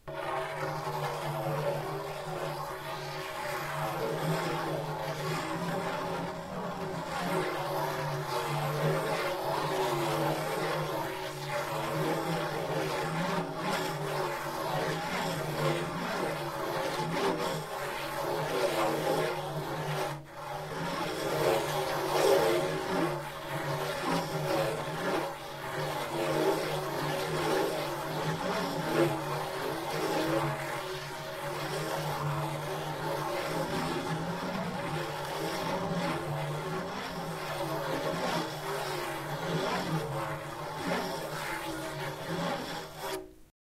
Sound of the leather of the hand drum.Recorded with a Zoom H1.
drum, hand, stick